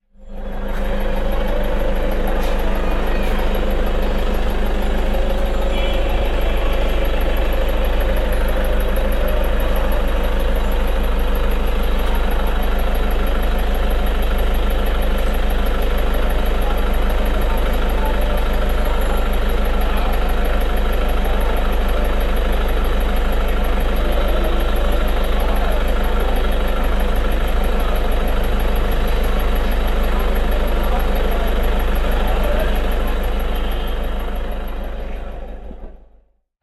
Sound of a SUV engine. Recorded using a Zoom H4N.
automobile, car, engine, ignition, start, SUV, vehicle